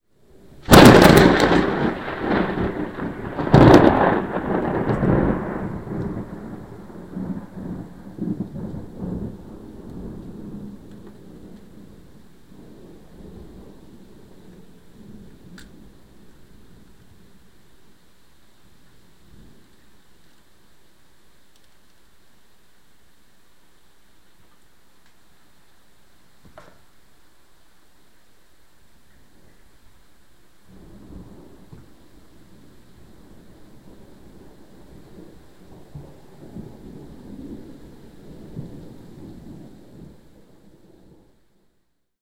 This was a very very close lightning strike to me. Recorded in Pécel, Hungary, on 9thof August, 2008, in the morning before the sunrise. I opened the window to take some photographs just before it struck. After the huge flash,the sound came directly into my ears! Unfortunately not managed to take photos of cloud to ground lightningbolts. I recorded it by MP3 player.
field-recording, storm, thunderstorm, weather